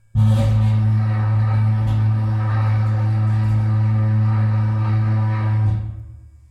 mid1 - mid1
Electric shaver, metal bar, bass string and metal tank.